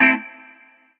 DW A M GT CHOP
DuB HiM Jungle onedrop rasta Rasta reggae Reggae roots Roots
HiM, Jungle, rasta, reggae, roots